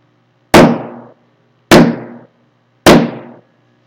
Sonido de un disparo